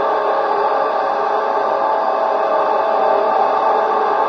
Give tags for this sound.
atmosphere processed horror drone ambient electronic loop generative sci-fi

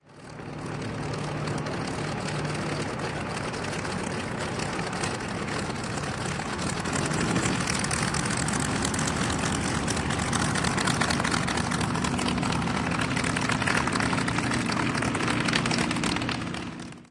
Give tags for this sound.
Butcher-bird Focke-wulf Fighter Axis BMW Fw-190 Warbird plane airplane aircraft Radial Luftwaffe WWII Vintage Idle Engine

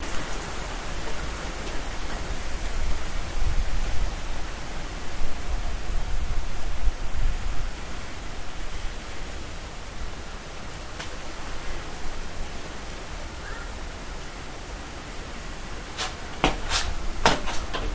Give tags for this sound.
winds human waiting wind stepping feet field-recording

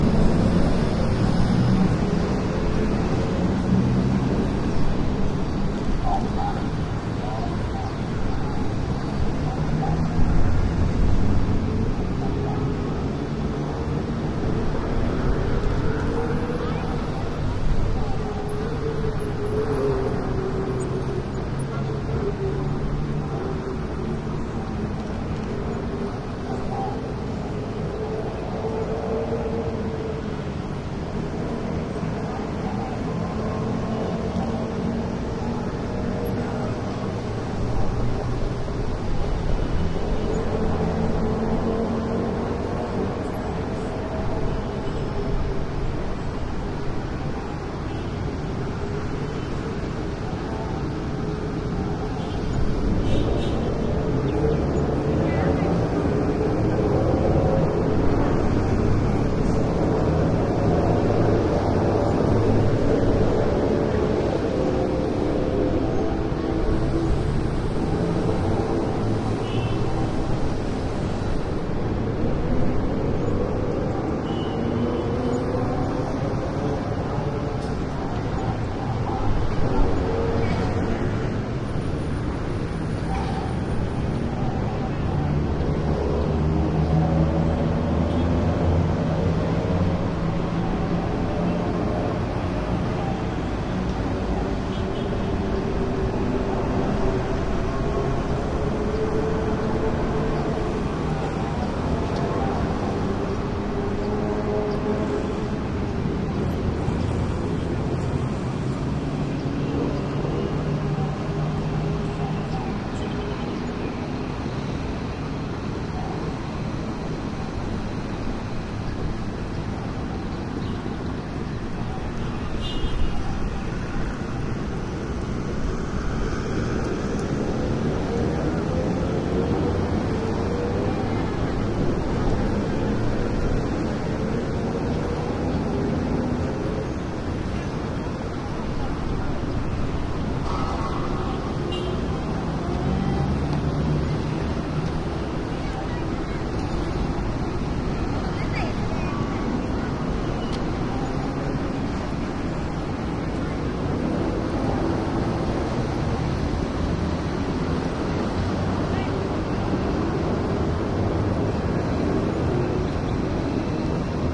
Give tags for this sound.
traffic Thailand cars taxis city motorcycles wide square field-recording motorcycle tuk Bangkok

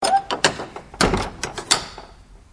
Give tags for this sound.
Clank Close Closed Door Doorway Squeak Wood

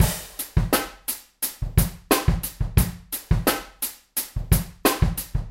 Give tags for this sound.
beat crash drums hihat hop kick kit snare

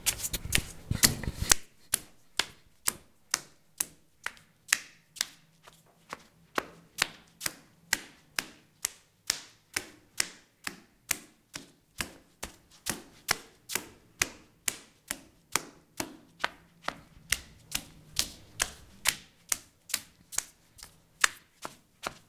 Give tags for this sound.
bare feet foot footsteps run running steps walk walking

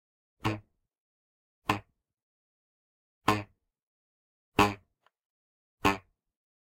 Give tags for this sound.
boing cartoon fall falling slide slide-whistle spring sproing toon whistle

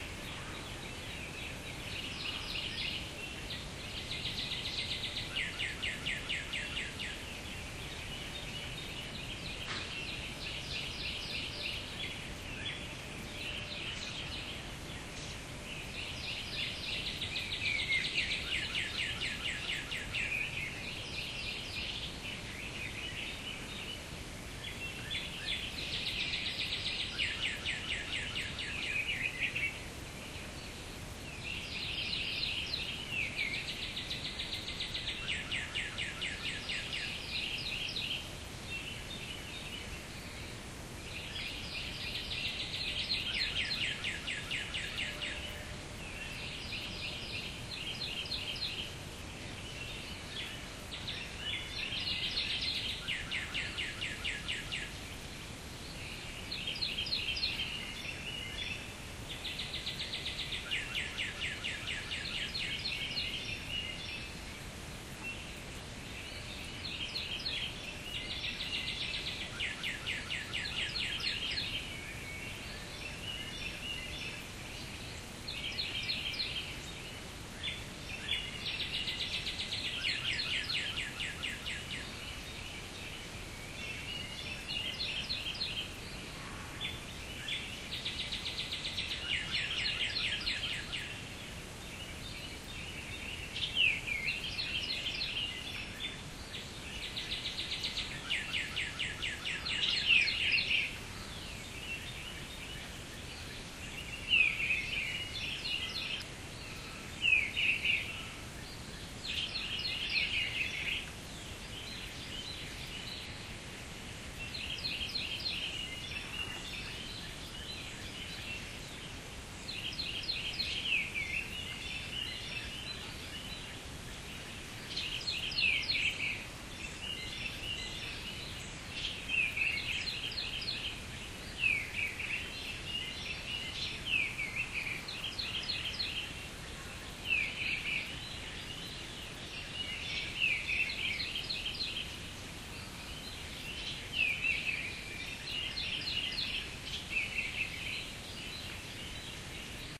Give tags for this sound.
bird chirping singing chirp

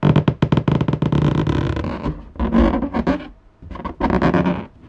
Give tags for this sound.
experiment balloon

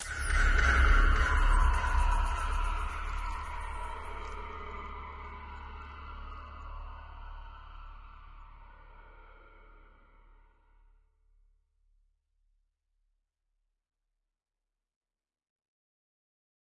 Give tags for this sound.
Air Alien Ambience Artificial Deep Effect Gas Machine Noise SFX Sound Wind

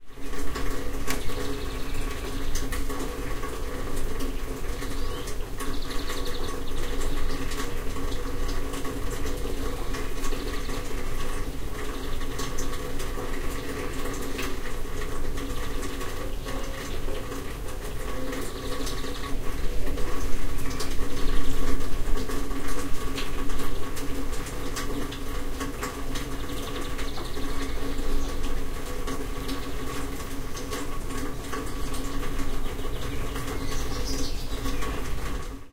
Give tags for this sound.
aluminum room

